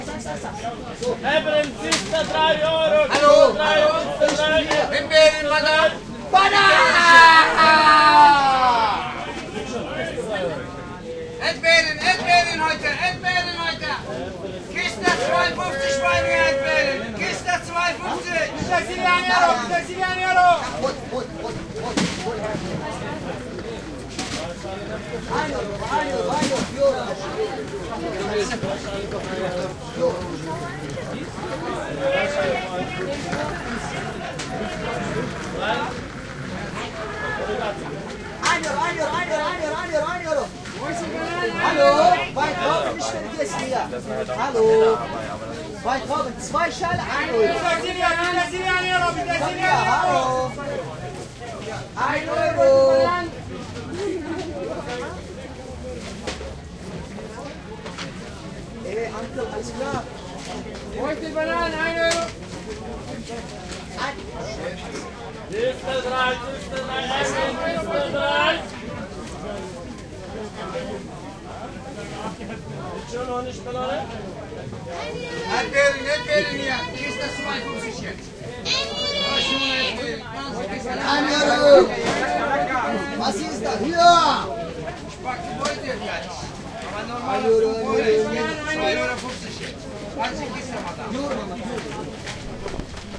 The weekly market in Leipzig at the Sportforum. You can buy a lot of very cheap fruits and vegetables. A nice mixture of different cultures and people. You can hear mostly german and arabic speaking people, screaming, singing, talking ...
The loudest barker sell the most? Hm.